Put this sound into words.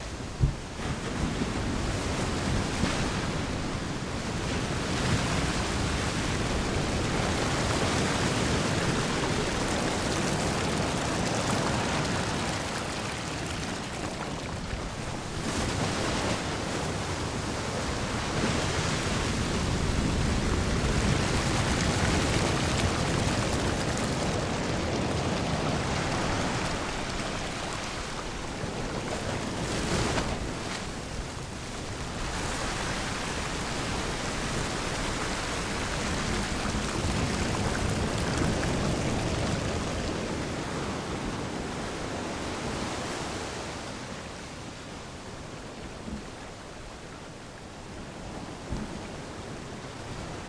Rocky Surf 5 161205 mono
Water trickling. Waves crashing. - recorded on 5 Dec 2016 at 1000 Steps Beach, CA, USA. - Recorded using this microphone & recorder: Sennheiser MKH 416 mic, Zoom H4 recorder; Light editing done in ProTools.
field-recording, ocean, waves